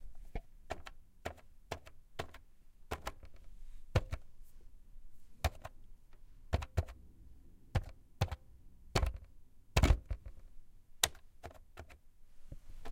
Phone Buttons 1
Pressing phone buttons
mobile, pressing, Clicking, buttons, telephone, phone